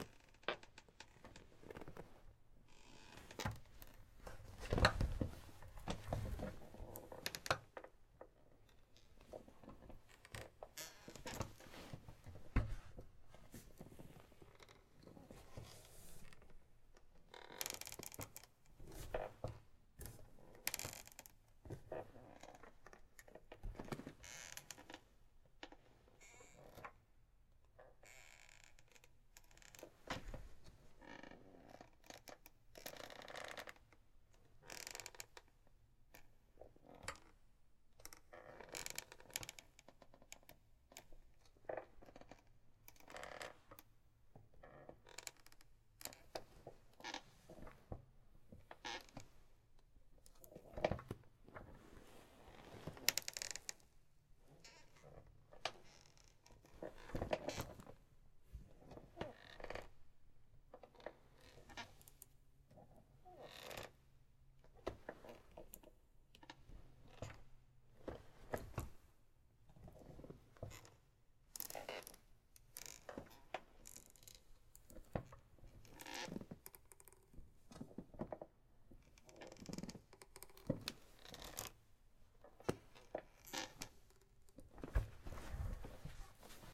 moving around in squeaky office chair
seated in an office chair with arms, moving around, leaning back, getting up, sitting down, lots of creaks and squeaks in the chair.